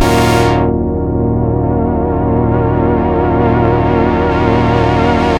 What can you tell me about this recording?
Poly800 Chord 2
Self created patch on my Korg Poly 800 MKI (inversed keys, as if that would matter ;))